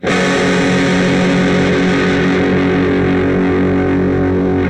Power chords recorded through zoom processor direct to record producer. Build your own metal song...